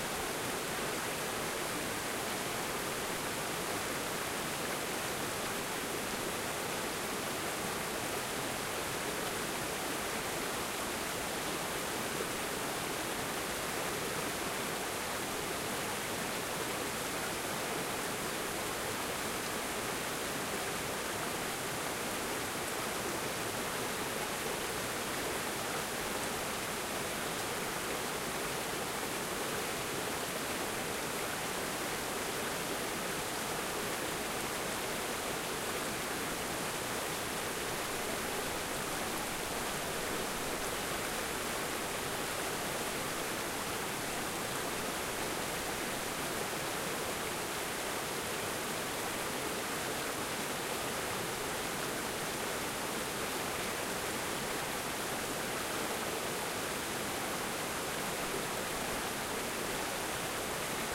stream small
A bubble struggle stream. Water flowing over rocks. Recorded with a H43 in Norwegian wood.
field-recording, flowing, nature, river, water, water-stream